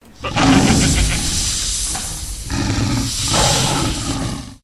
Roar sound fx for this mithical beast ,mixed from many animals sounds
beast, beasts, creature, creatures, egipt, growl, Lion, mithical, monster, scary